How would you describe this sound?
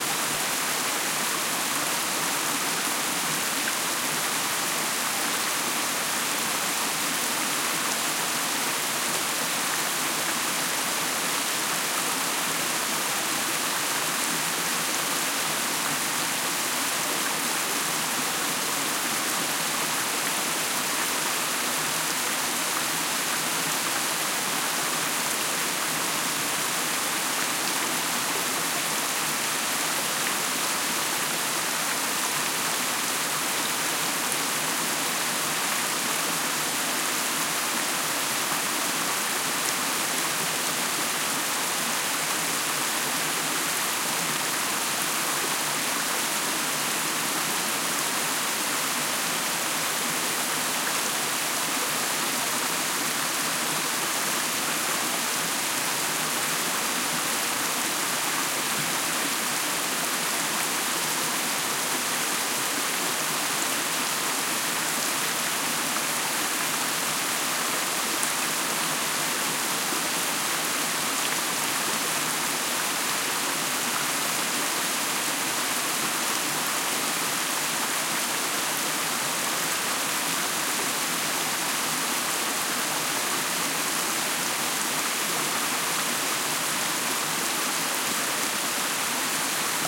River WaterRushing
Normally this is a small creek in the forest - but this time after 4 Days of constant rain, the creek became a river - and ran wildly through the forest. This pack contains different recordings from further away and close up of the flowing creek. So could be useful for a nice soundmontage of getting closer to a waterstream or hearing iht from a distance.....
wild, river, stream, creek, bavaria, field-recording, forest, gurgle, morning, atmosphere, flow, nature, water, ambient